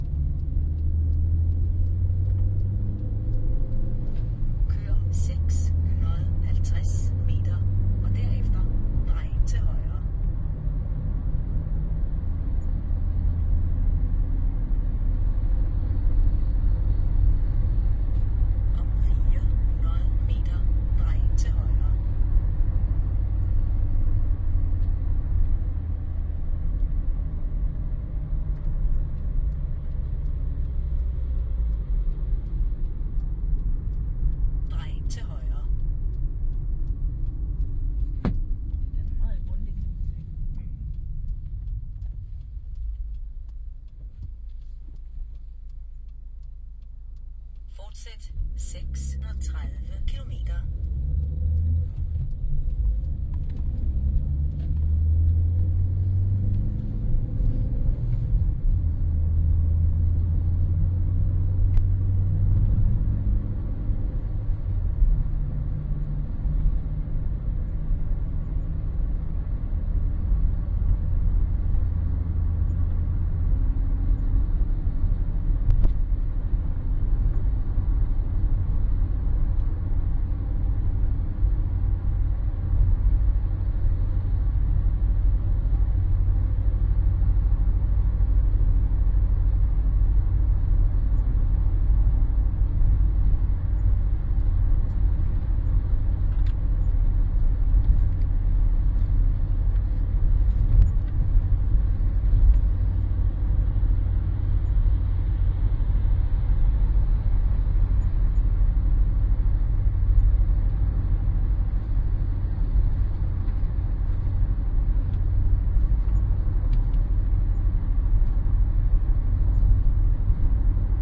danish car
That´s what it sounds like, travelling through the Harz mountains in a car with a danish speaking navigation system and some danish friends : )Soundman OKM, A3 adapter and Edirol R-09HR recorder.
binaural, car, danish, field-recording, navigation